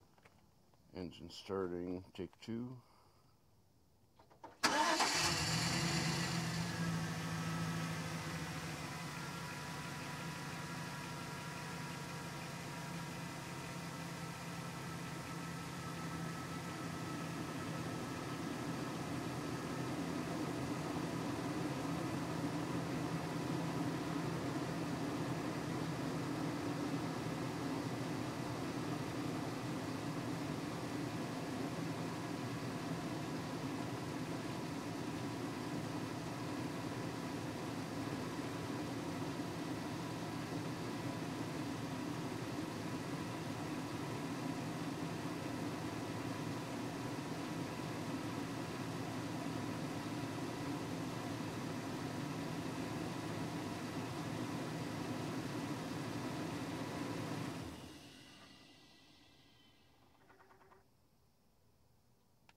start idle off
Car startup, extended idle, shut off.
engine, automobile, shut, motor, starting, vehicle, idle, start, off, car, ignition, auto